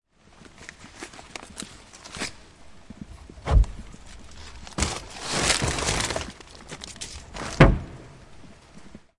Throwing Trash Away in the Rain
At night in the courtyard, throwing away the trash.
Recorded with a Zoom H2. Edited with Audacity.